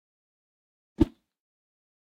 High Whoosh 04
whip, swoosh, whoosh, woosh